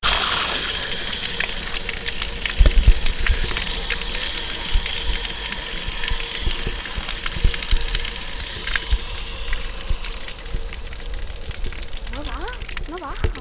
Al parc d' en fondo d' en peixo, de tots els sorolls hem decidit fer el de la font i l' aigua sortint a pressiò, ya que es un soroll bonic i relaxant.